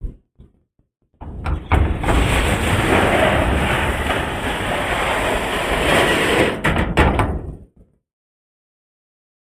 Door opening
The sound of me slowing opening an old rusted shed door.
I down pitched it to make it sound more weighted.
This sound, like everything I upload here,
door, entering, free, heavy, old, open, opening, opening-door, pull, push, pushing, rusted, shed, slow